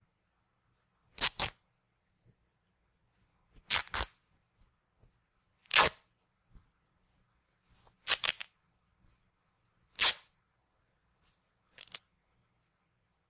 Some sounds I recorded a while back of fabric ripping.